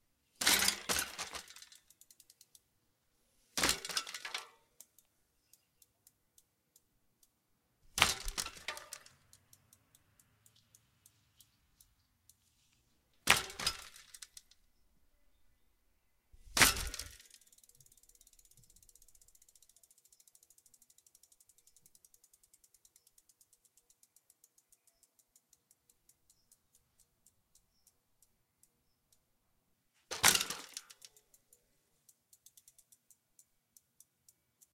Bicycle falling over with wheel spin, 6 takes
A bicycle repeatedly dropped on grass with resulting wheel spoke spin
chain, fall, grass, spin